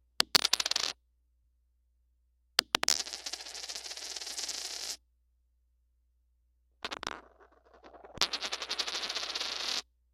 coin twirl 2
A series of dropping a coin (Sacagawea dollar) onto a wood floor and letting it come to rest. At the end, spinning it. Recorded with a Cold Gold contact mic into Zoom H4.
spin, twirl, wood, contact, wiggle, coin, metal, floor